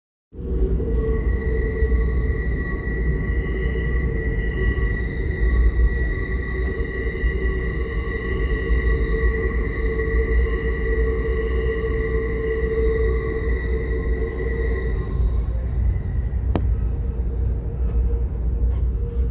Train Tunnel 2
Recorded while on train going through tunnels up in a mountain pass
atmospheric, background-sound, railroad, spooky, train, tunnel